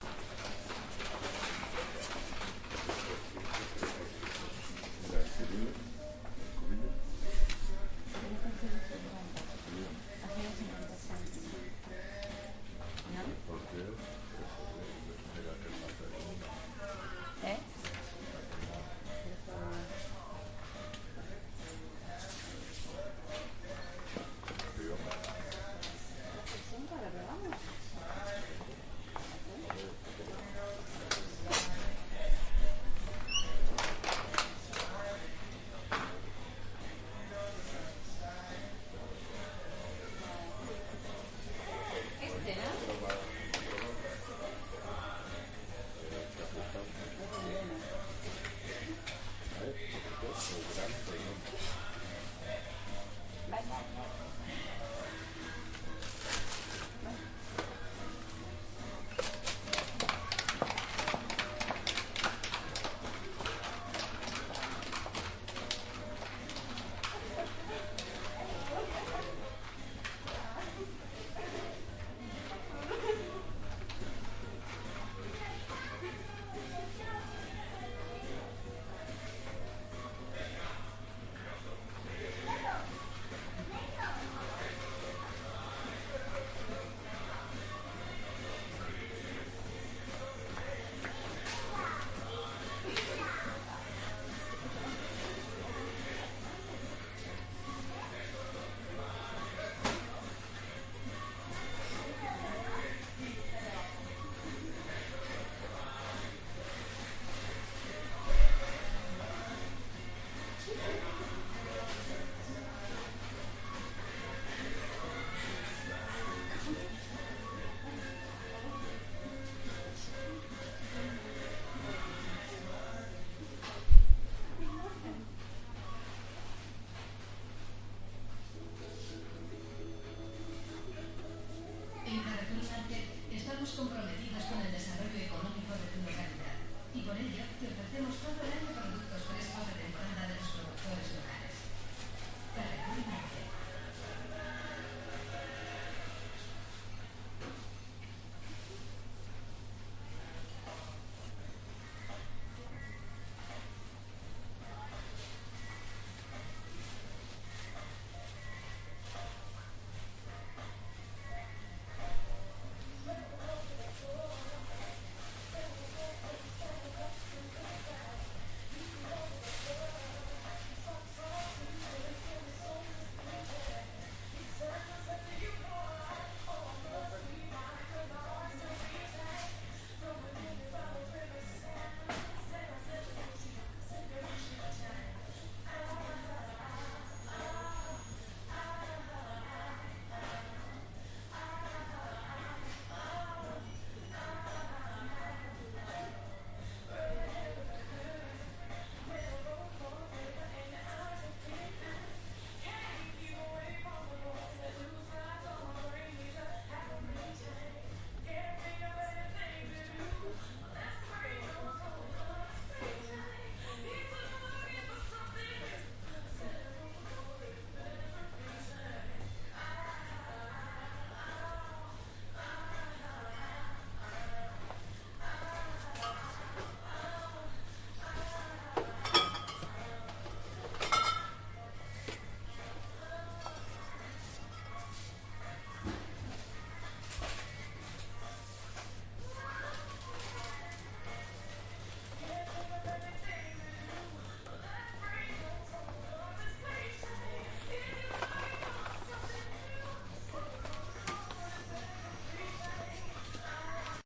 Ambiance mercado 7
Sound of a shop with people who speak en background. We can listen walk and sound of machin and article.
sound, people, speak, supermarket, shop